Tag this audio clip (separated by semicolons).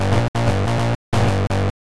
dance; acid; techno; loop; remix; trance